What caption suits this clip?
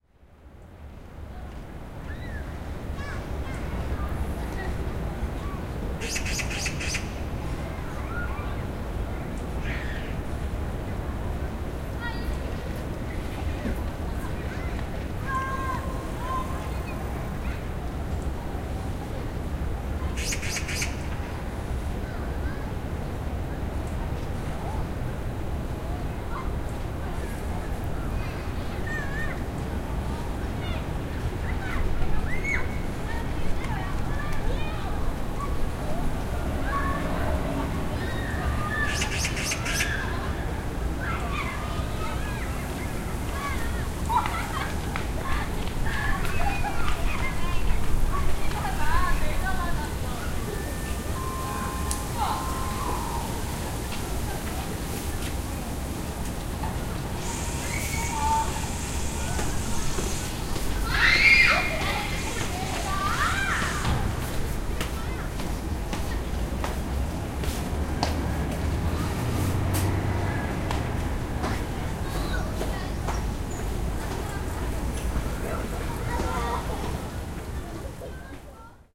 0340 Birds and fun
Birds. Kids children playing and screaming. People walking.
20120629